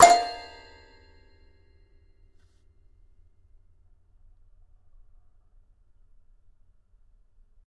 Toy records#23-F4-04
Complete Toy Piano samples. File name gives info: Toy records#02(<-number for filing)-C3(<-place on notes)-01(<-velocity 1-3...sometimes 4).
toys, sample, instrument, toypiano, toy, piano, samples